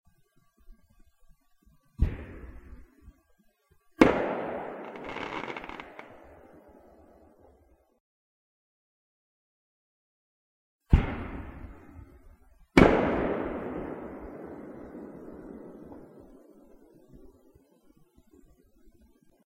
Two launches w. bangs collection
Launches and bangs I recorded at midnight on New Years, 2009. Heavily processed.
collection, new-years, launch, 2009, 2010, firework, bang